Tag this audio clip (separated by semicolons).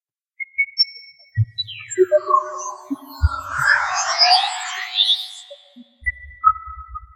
ambience; image-to-sound; sci-fi; moon; atmosphere; bitmaps-and-waves; ambiance; background; ambient; soundscape